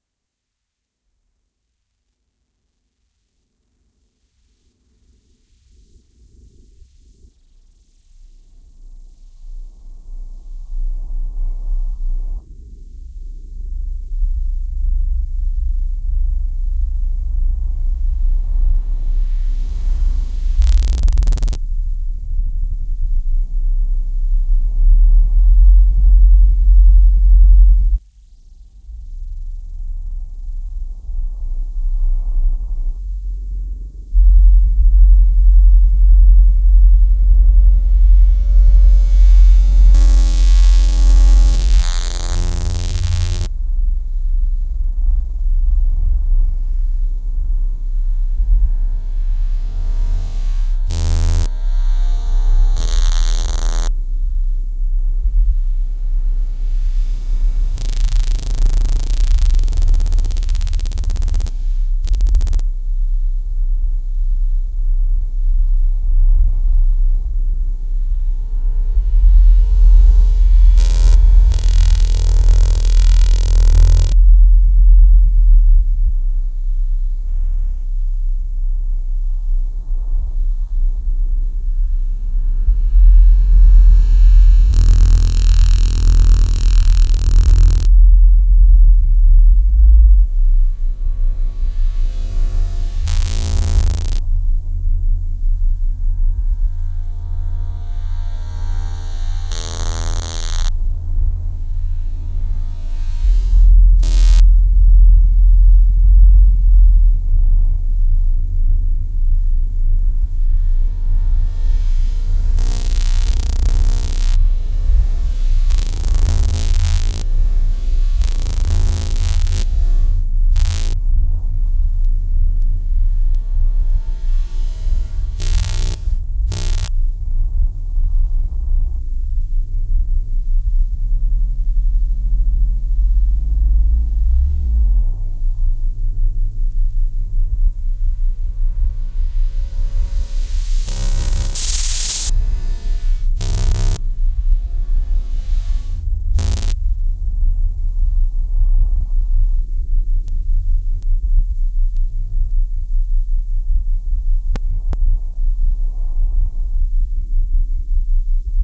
As usual.. A Triple OSC was used. I played with the different possibilities... Sine, triangle, saw, square waves were used here.
It may cause damage on high volume (maybe?)
Effects: Phaser, Reverb.
After recording, the entire soundshape was inverted.